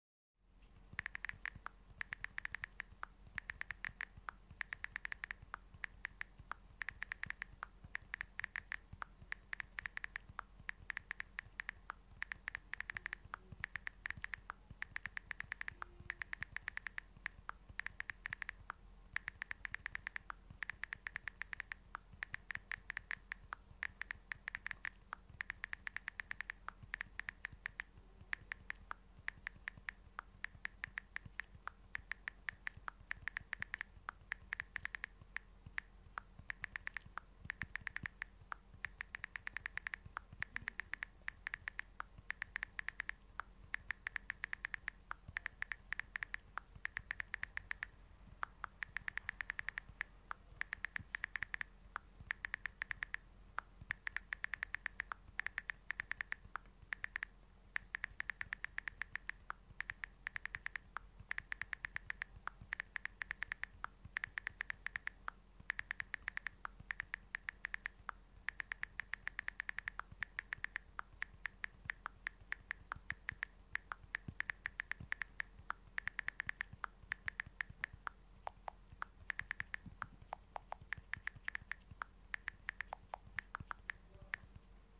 iPhone Texting, Remastered
Special S/O to Apple, iPhone!
iPhone Texting, can't get more juicyy! If you wanna credit, credit --> Apple, iPhone!
My two nieces, two Tiktokers, came by and sat on the sofa in my room. They both got iPhone and they were texting their friends, so I took the opportunity to record summ !!
We all tried to be as quiet as possible during the recording...!
If you enjoyed the sound, please STAR, COMMENT, SPREAD THE WORD!🗣 It really helps!
phone, keyboard, iphone, type, smart, text, typing, chill, mobile, relaxing, couch, friends, smartphone, texting